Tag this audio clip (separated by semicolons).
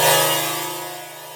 1-shot; cymbal; PADsynth